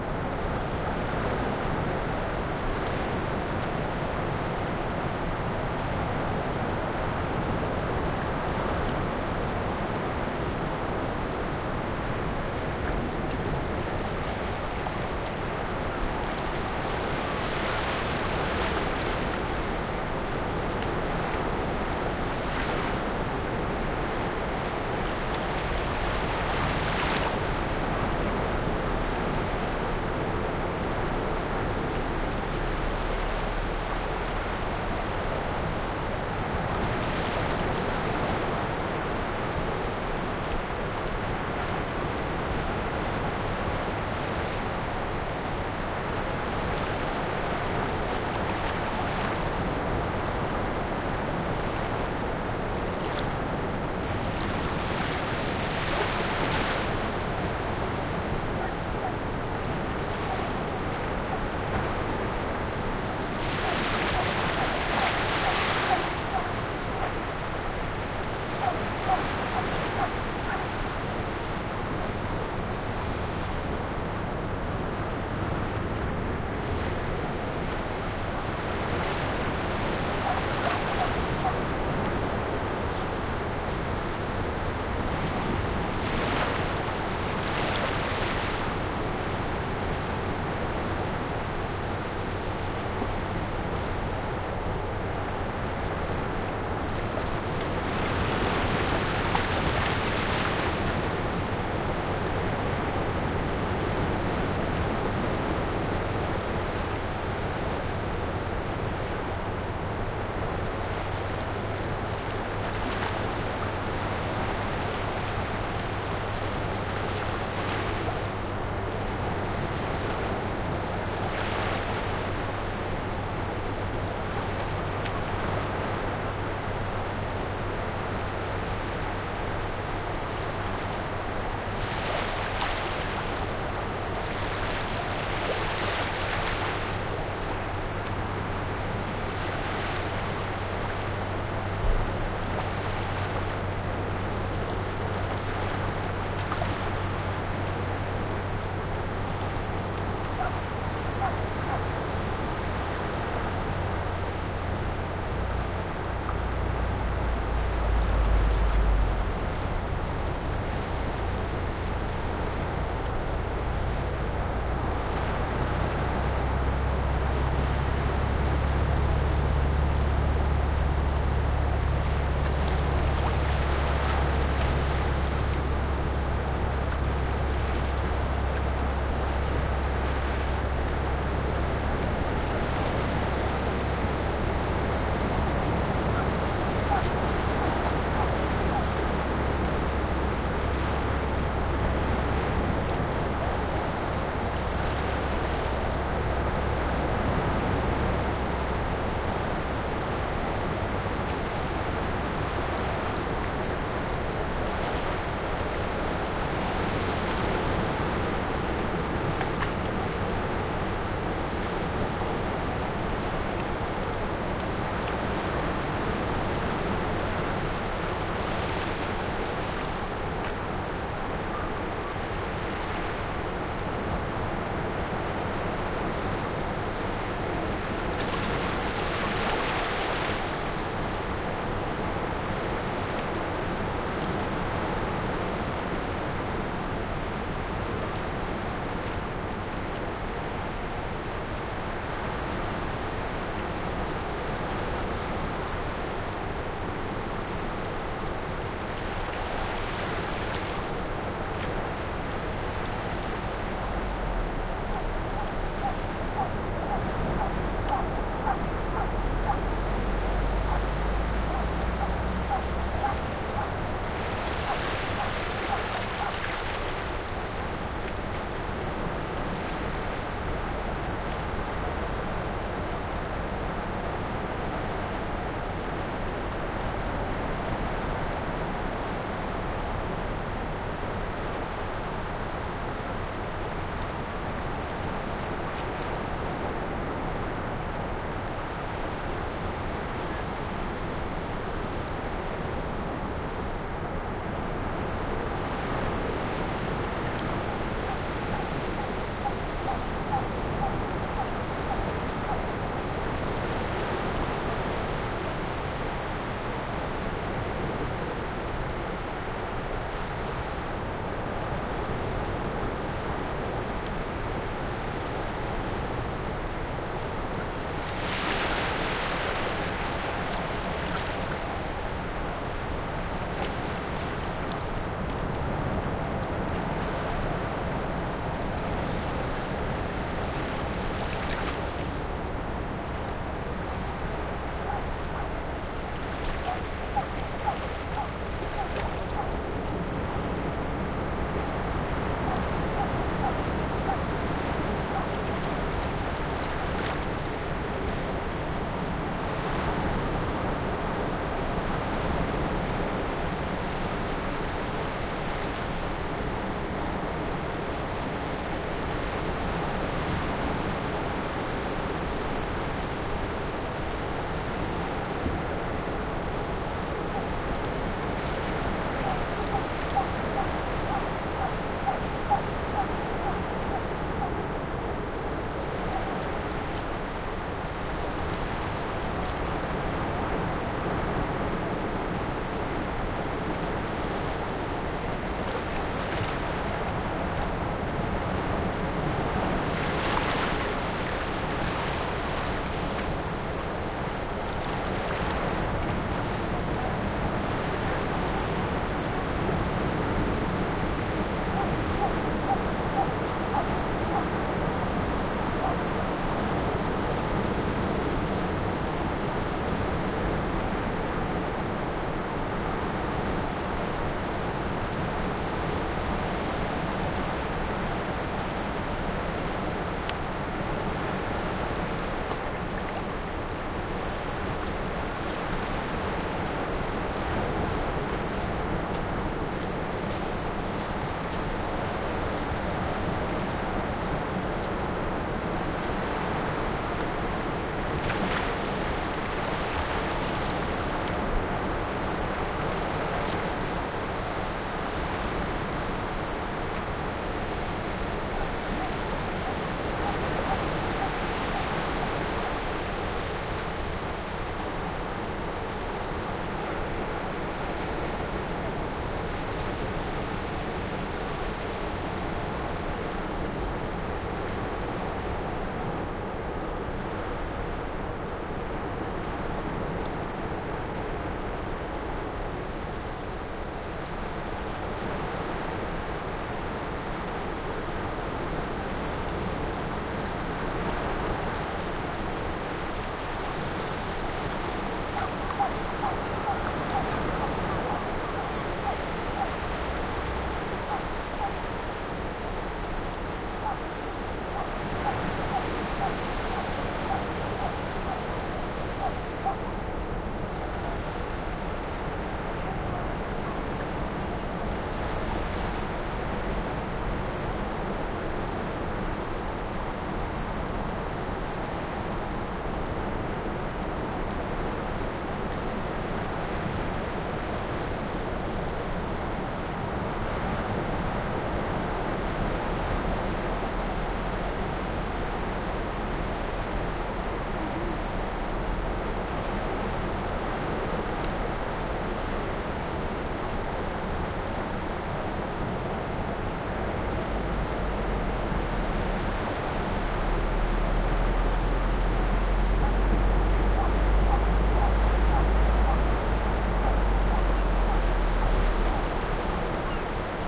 Beach North Berwick
For a town beach the one in North Berwick is hard to beat. This track
was recorded there in the South East of Scotland in June with those Shure WL 183 microphones, a FEL preamp into an iriver ihp-120. waves, some people and seagulls can be heard.